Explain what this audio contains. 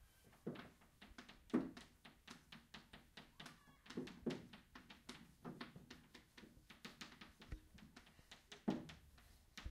Here is sounds that pupils have recorded at school.
france, lapoterie, rennes, sonicsnaps